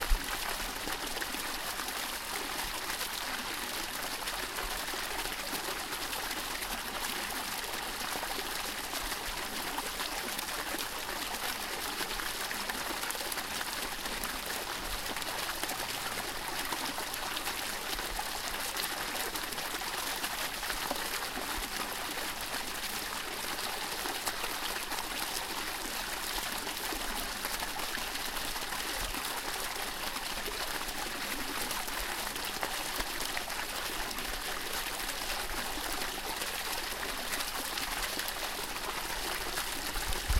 Fountain, recorded at higher gain.
field-recording, fountain, splash, splashing, water